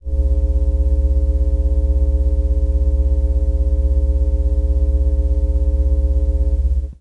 Various sources of electromagnetic interference recorded with old magnetic telephone headset recorder and Olympus DS-40, converted and edited in Wavosaur. Ceiling fan motor.
electromagnetic, hum, intereference, noise, radiation